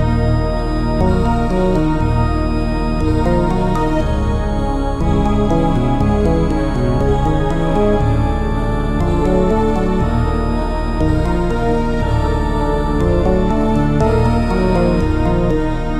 short loops 21 02 2015 3
made in ableton live 9 lite
- vst plugins : Alchemy
- midi instrument ; novation launchkey 49 midi keyboard
you may also alter/reverse/adjust whatever in any editor
gameloop game music loop games dark sound melody tune church techno bootup intro
church, dark, game, gameloop, games, loop, melody, music, sound, techno, tune